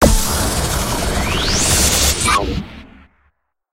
Radio Imaging Element
Sound Design Studio for Animation, GroundBIRD, Sheffield.
bed, bumper, imaging, radio, splitter, sting, wipe